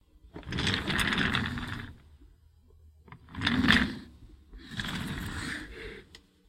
moving round and round on an office chair with wheels